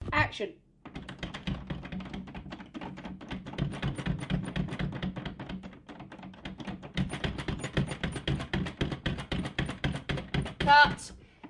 Door shake
A simple recorder using an Olympus of a door that was shaken (a cellar door for the echo). We used in a scary scene for our thriller.
cellar, door, doors, handle, rattle, scary, shake, shudder, thriller